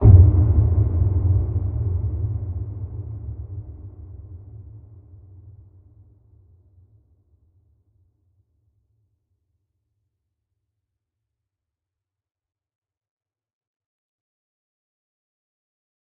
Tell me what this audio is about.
Wooden stairs impact 1(Eq,rvrb,MSEq,lmtr)
Atmospheric impact sound. Enjoy it. If it does not bother you, share links to your work where this sound was used.
Note: audio quality is always better when downloaded.
bass, boom, boomer, cinematic, drum, effect, film, filmscore, fx, game, hit, impact, kick, low, metal, motion, movie, riser, score, sfx, sound, sound-design, stairs, stinger, swish-hit, swoosh, trailer, transition, whoosh, woosh